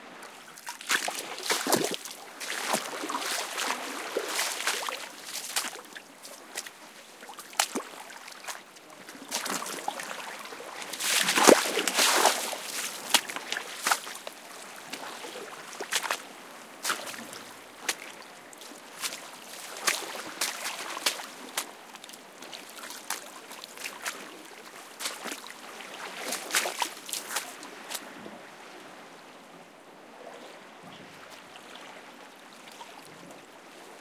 20110903 splashing.walk
walking on shallow water. Shure WL183, Fel preamp, PCM M10 recorder
beach, field-recording, sea, splashing, water, waves